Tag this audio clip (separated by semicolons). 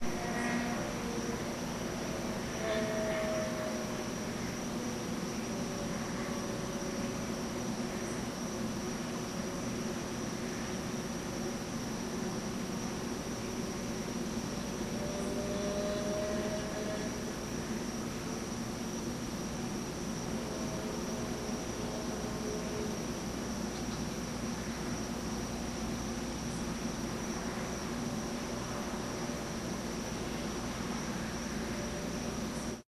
chopper; manhunt; helicopter; field-recording; police; search